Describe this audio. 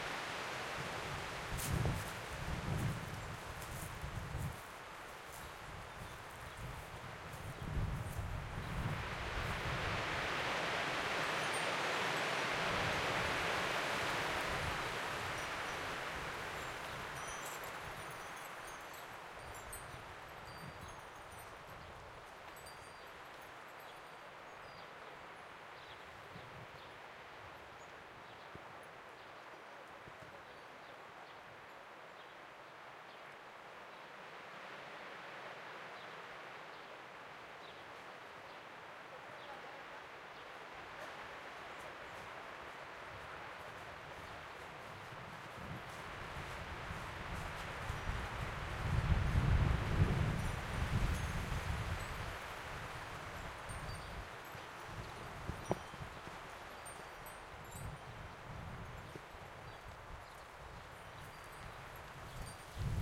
Vent a Denia
Denia (Valencian Country) countryside field-recording summer wind.
Sound hunter from Valencia, Spain
field-recording, fire-crackers, trees, summer, countryside, nature, ambiance, pines, ambient, birds, Denia, chicharras, wind, ambience, windy, crickets, mediterranean